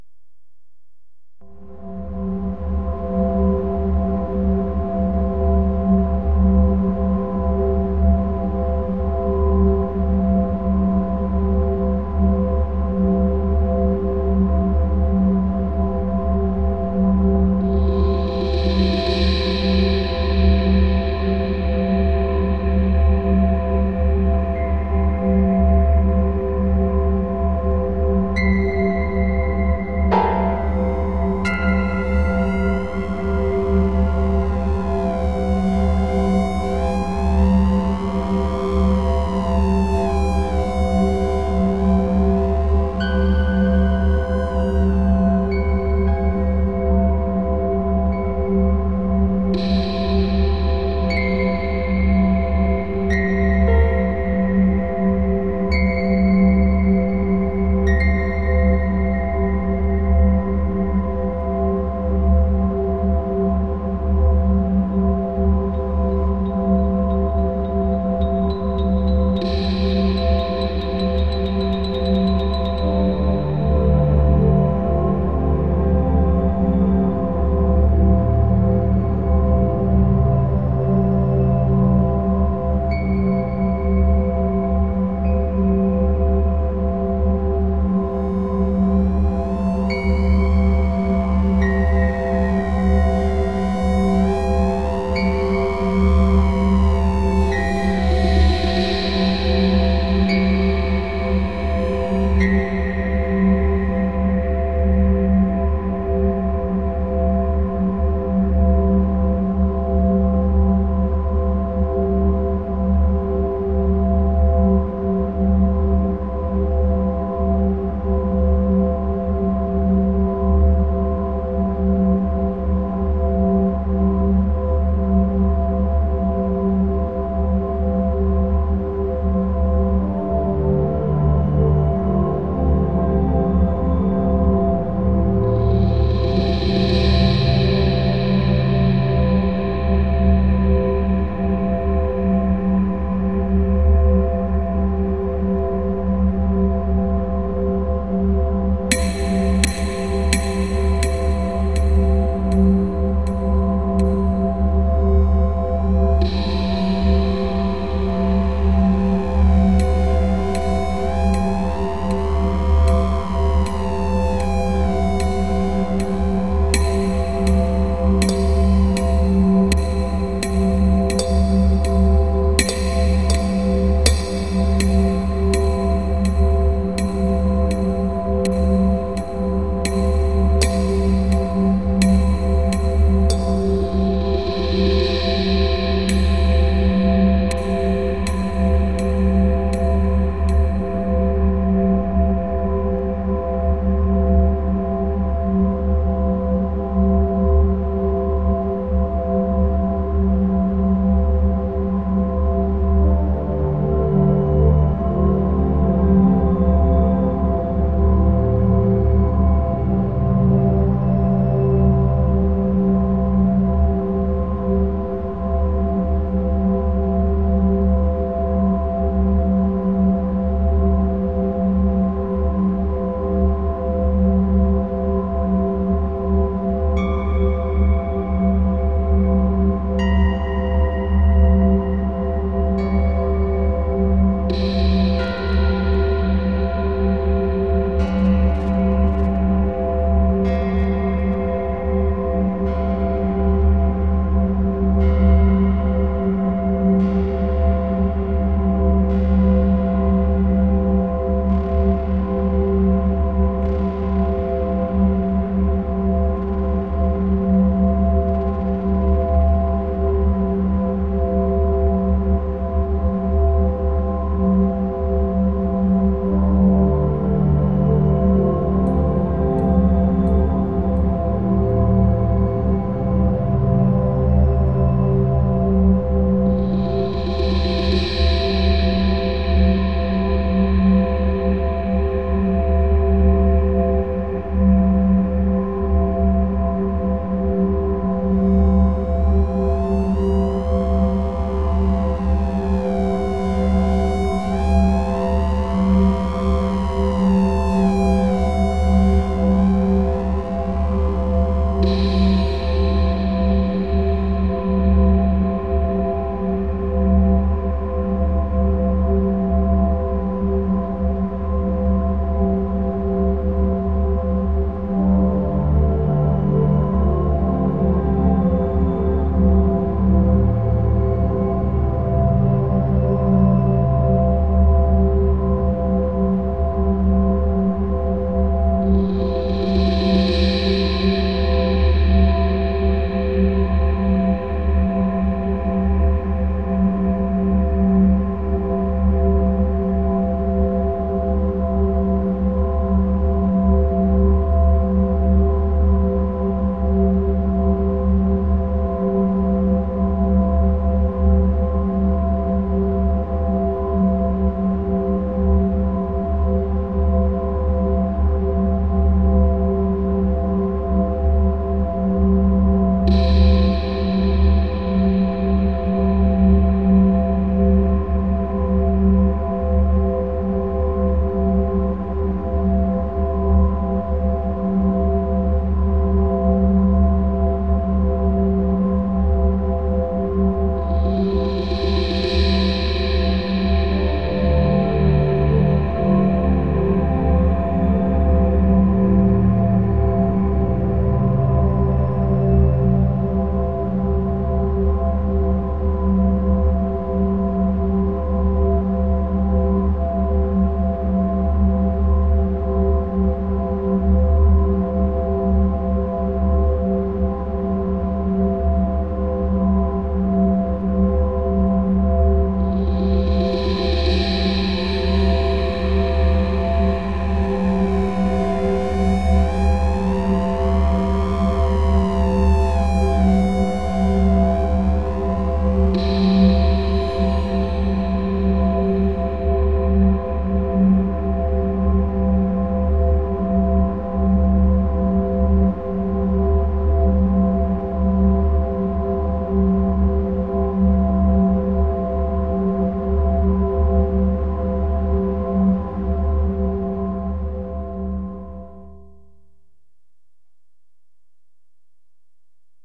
track 1 - ambient wasteland - cut 2
Original composition. Created using Ableton Live + Audacity. A rough cut of an ambient track for a video game.